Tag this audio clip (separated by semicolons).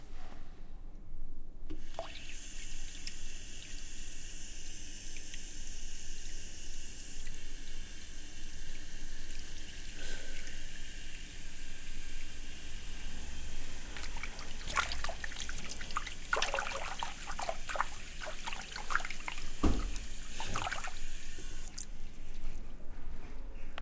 domestic-sounds; recording; water